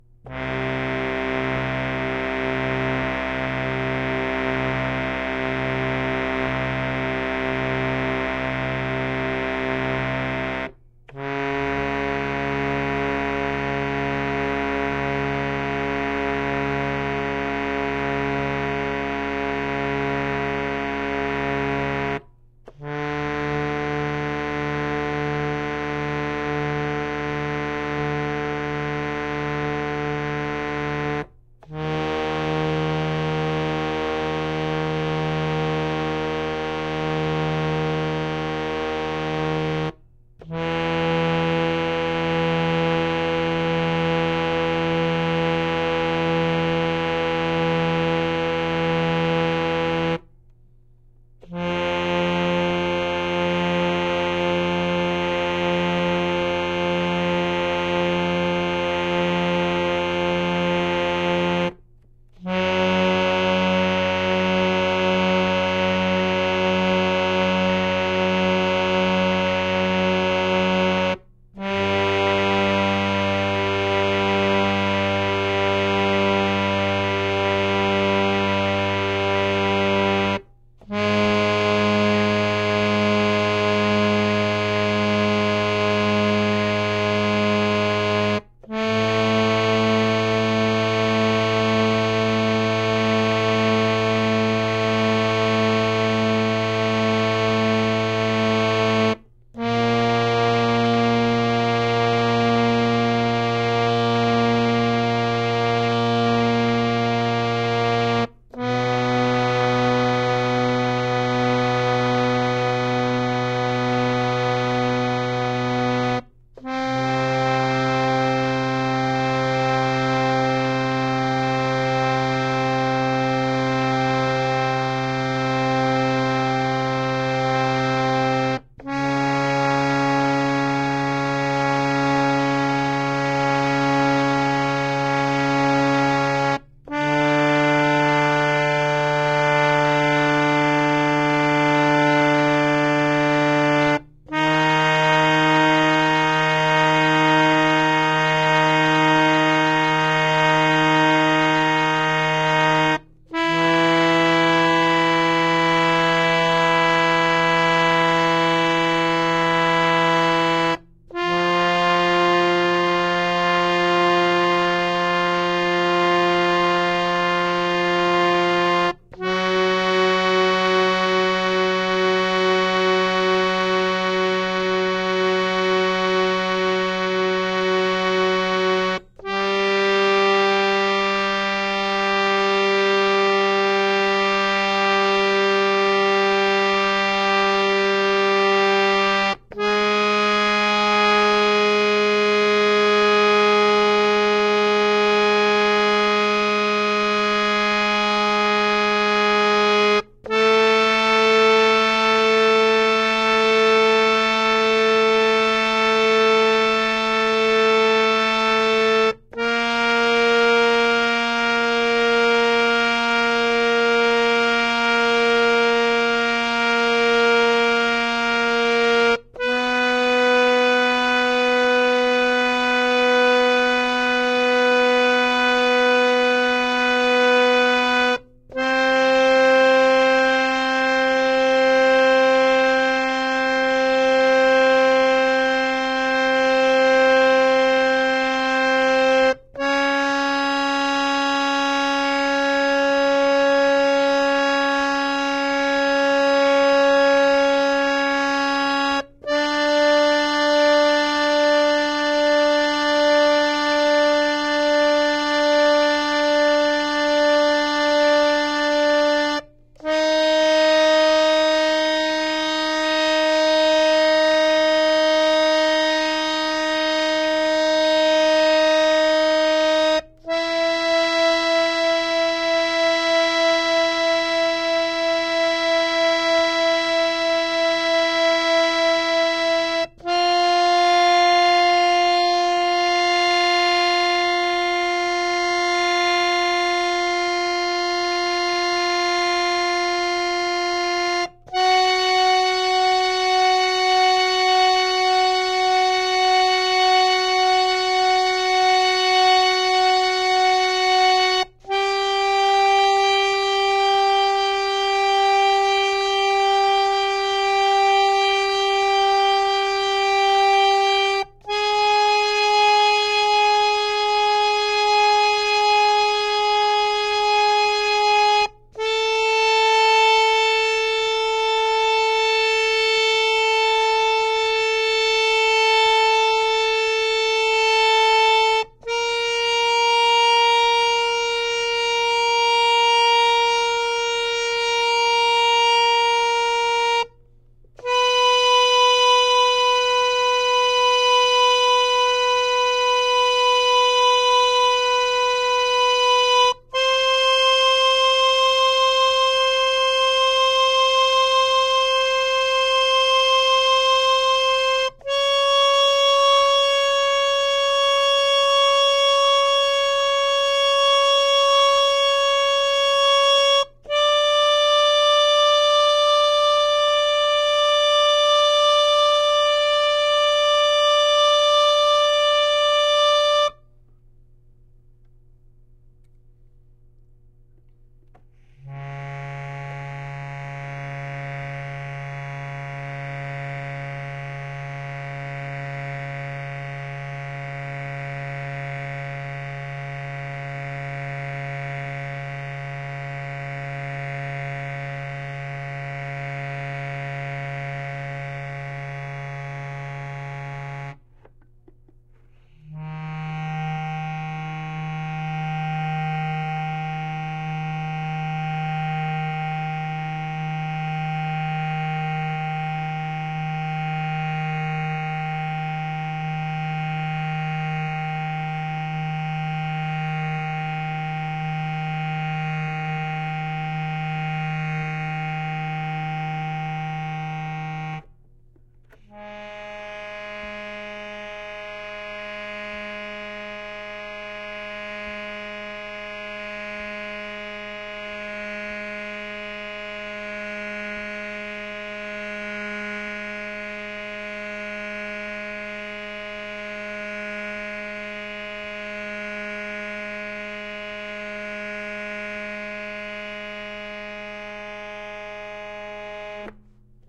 Samples of all keys and drones separately from a harmonium. Recorded in the Euterpea Studio at Yale University's Department of Computer Science. Some equalization applied after recording.